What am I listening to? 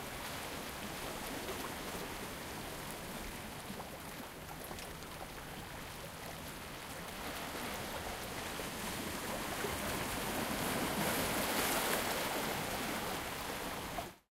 Taken with Zoom H2N, the beaches of Cyprus
beach, coast, ocean, sea, seaside, shore, water, wave, waves